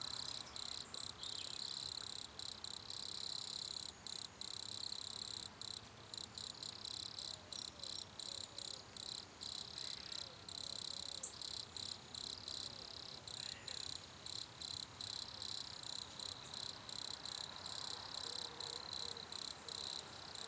Microphone: Rode NT4 (Stereo)